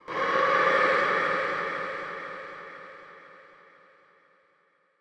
Monster Sigh in Cave
A monster breathing in a cave. Recorded and edited with Audacity.